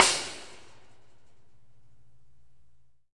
Hitting the back grate of a metal trailer with a wooden rod.